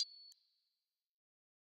Sine waves processed randomly to make a cool weird video-game sound effect.
glitch, effect, video, fx, random, pc, processed, electronic, game